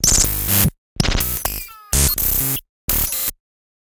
DigitalPercussion 125bpm05 LoopCache AbstractPercussion
Abstract Percussion Loop made from field recorded found sounds